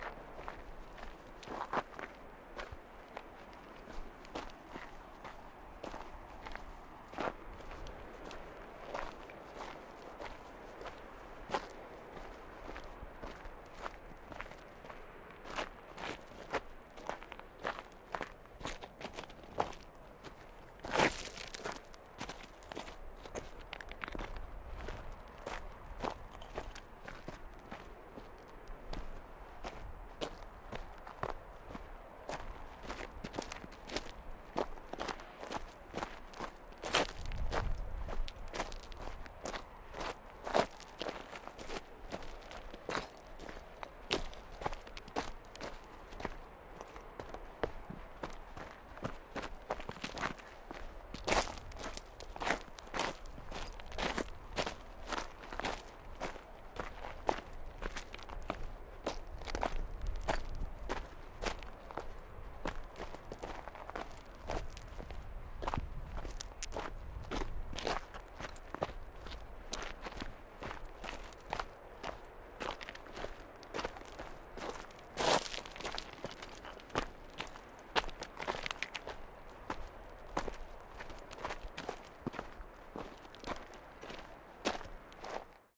Person walking by during a mountain hike in the Italian Alpes near Montblanc Pico Bianco
Recorded with Rode Videomicro and Rode iphone app
Alps, descend, foot, footstep, footsteps, gravel, Italy, mont-blanc, mountain, pico-bianco, rocks, step, steps, stones, walk, walking